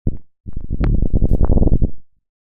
fart100bpm
it is fit to electro and minimal tracks
dark, deep, digital, electronic, experimental, fx, horror, noise, sample, sound-effect, space